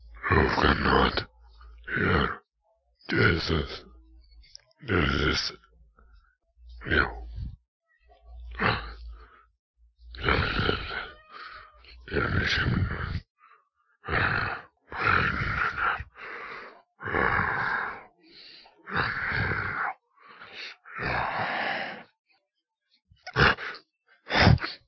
Monster Saying Gibberish Words
Creepy monster gibberish i made recording my voice and changing the pitch in Wavepad. Usable for horror games.
scary, monster, creature, beast